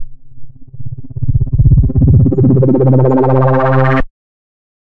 semiq fx
strange, fx, sounddesign, freaky, soundesign, sfx, electronic, glitch, loop, weird, sound, machine, noise, lo-fi, soundeffect, future, effect, digital, abstract, sound-design, space, sci-fi, synth, electric